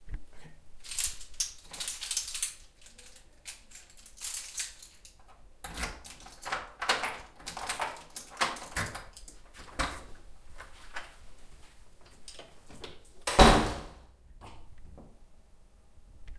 Unlock open close apartment door from hallway louder
Unlocking, opening and closing the door to a student apartment from the hallway. Bournemouth, UK
This is a bit louder than the other one.
close, hallway, open